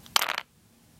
wood impact 11
A series of sounds made by dropping small pieces of wood.
block, crash, drop, hit, impact, wood, wooden